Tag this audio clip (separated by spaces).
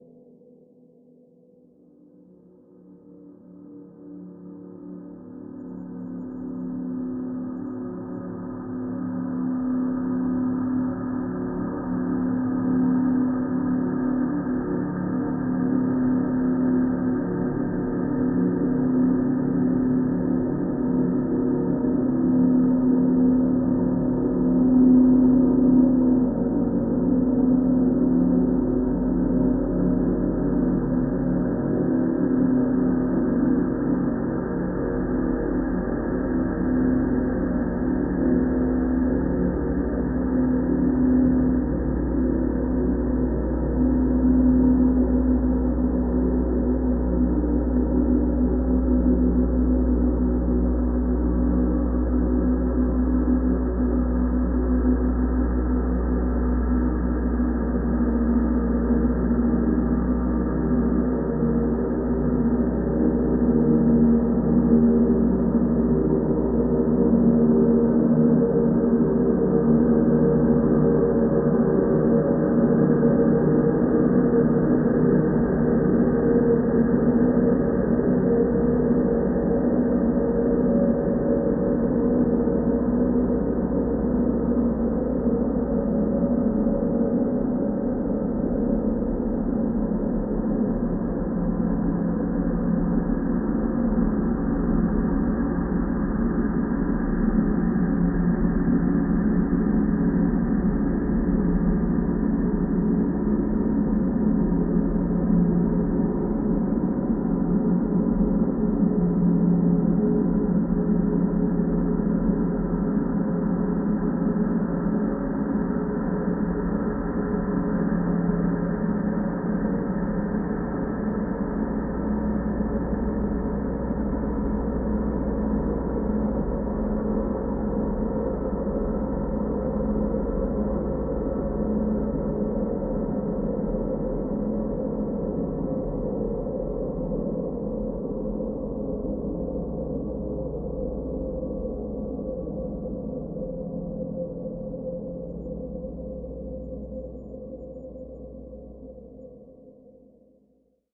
ambient drone multisample soundscape